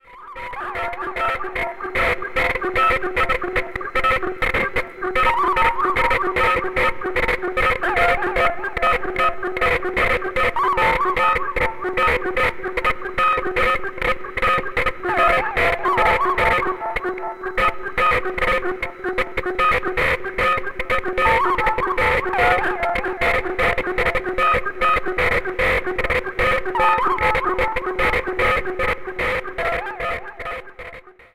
Screechy, metallic sound made on an Alesis micron.